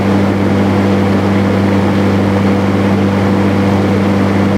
an electric lawn mower sound to loop